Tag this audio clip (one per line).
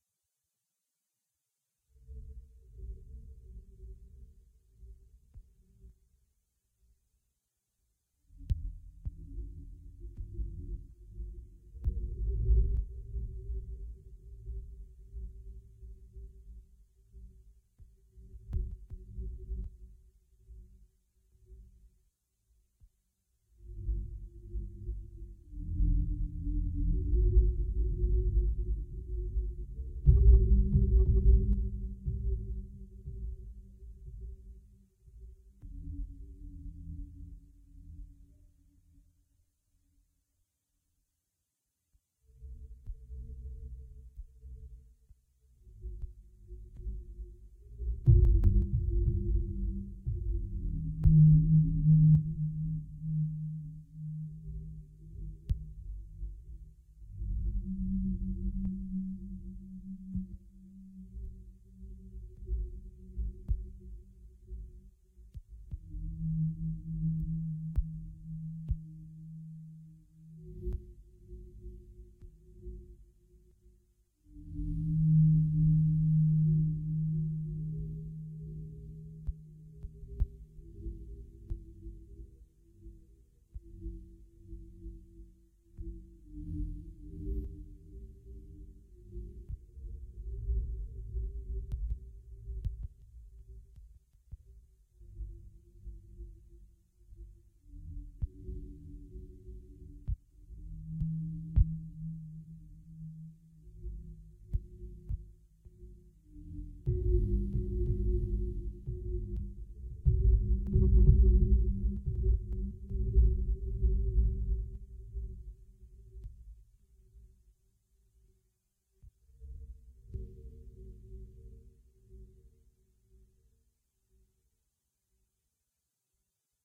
ambient
bass
cavern
cavernous
common
deep
disorder
effect
fx
inside
odds
reduct